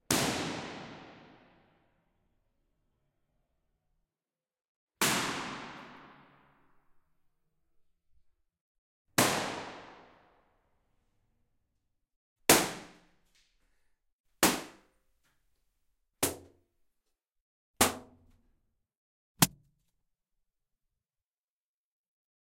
Balloons popping
Me popping balloon in different indoor ambiences. From most to least reverberation tine. a)Huge university's classroom RT=5sec b) Stairway RT=4sec c) Medium Hallway RT=2.5sec d) Living room RT=1sec e)Bedroom RT=0.5sec f)Bathroom RT=0.4sec g)Car RT=0.15sec
balloon, balloons, effect, gunshot, impulse, indoor, popping, response, sfx, shot